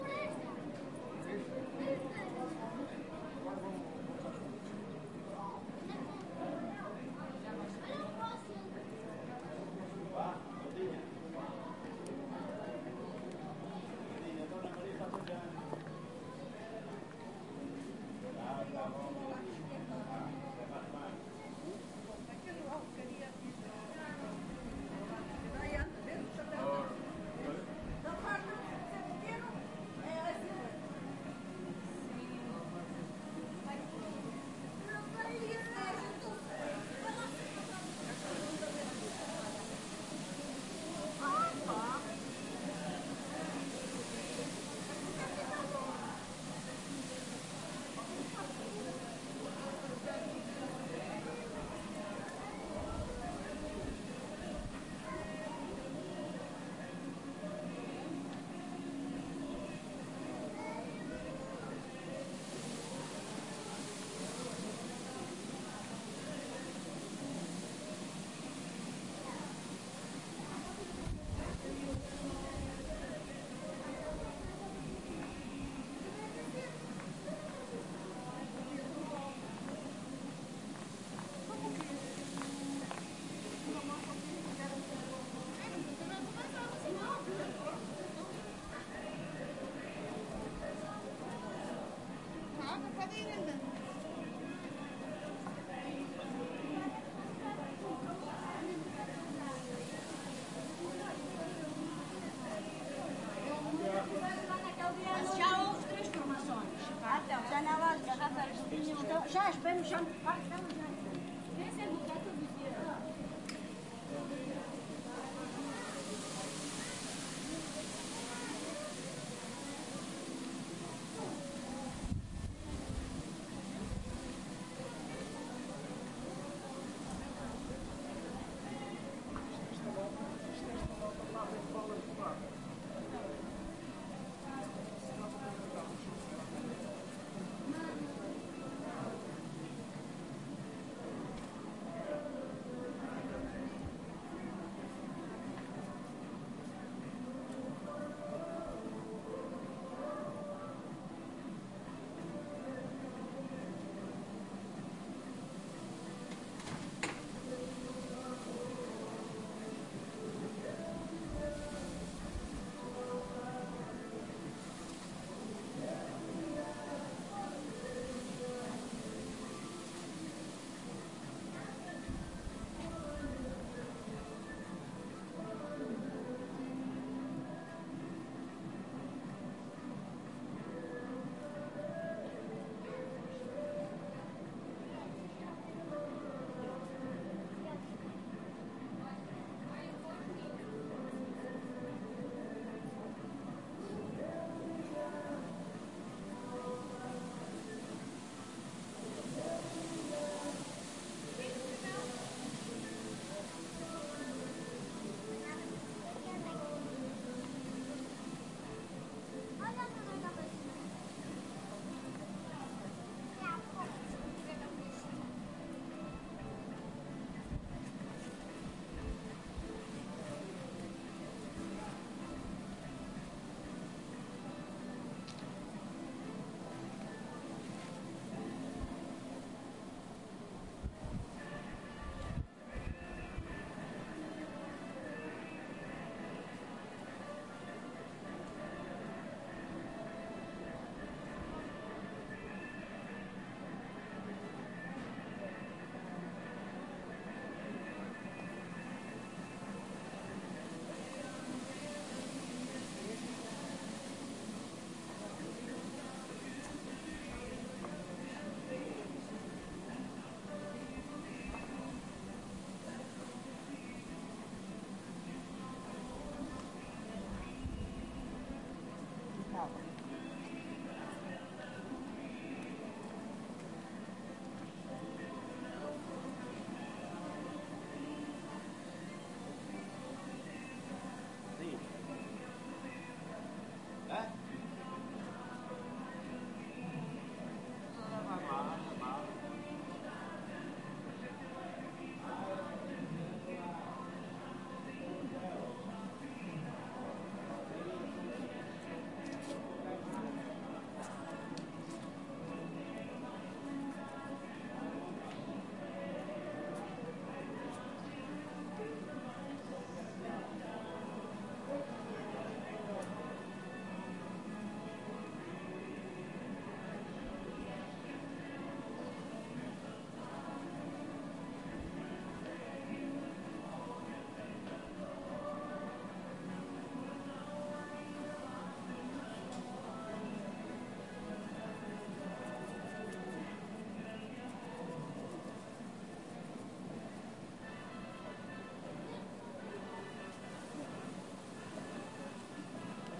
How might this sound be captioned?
130810- redondo ruas floridas 13 01
... during the summer festival at redondo ( alentejo ) in 2013 ... in the main street...